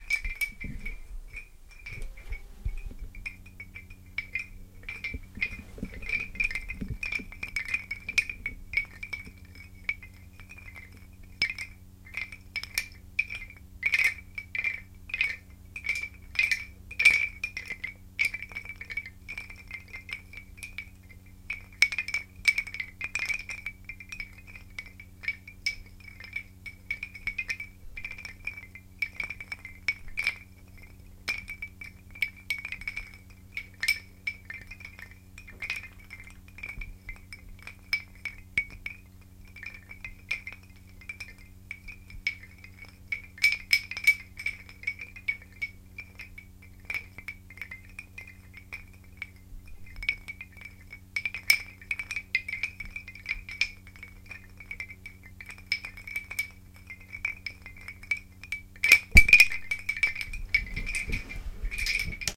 wooden chimes
Some wood wind chimes.